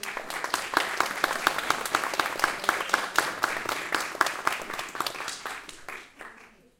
small group applause 2
About twenty people clapping during a presentation.Recorded from behind the audience using the Zoom H4 on-board microphones.